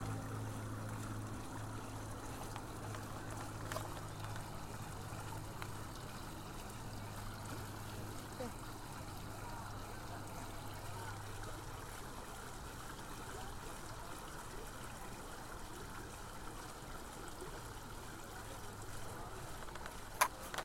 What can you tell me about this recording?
fuente agua
night, ambience